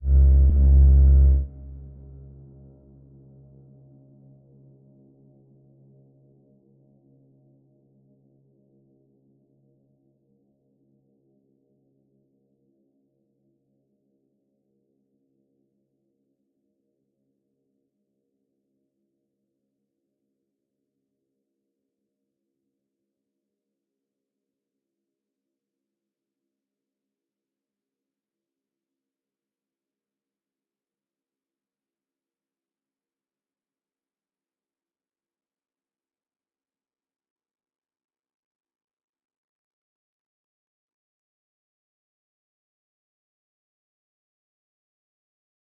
low, decay, pvoc, time-stretch, granular, abletonlive, maxmsp, soundhack
abletonlive, decay, granular, low, maxmsp, pvoc, soundhack, time-stretch